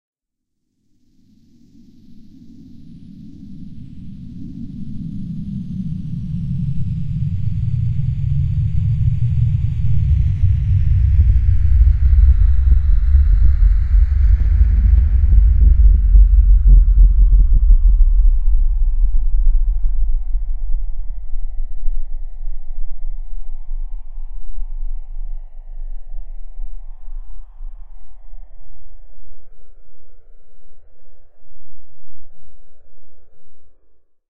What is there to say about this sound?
A good bottom / underlay for a starting / landing UFO
Sci-Fi, Space